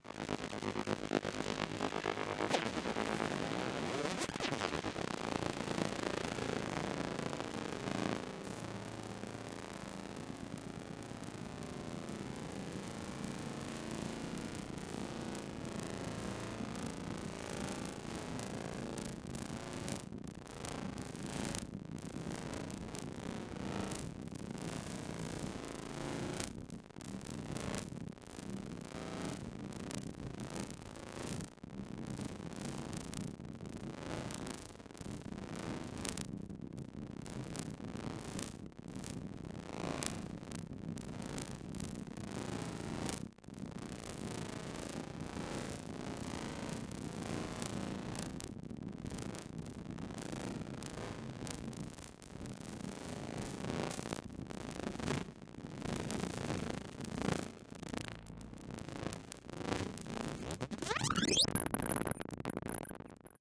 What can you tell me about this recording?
Electric static sweetener, synth experiment, sound effect for you to use.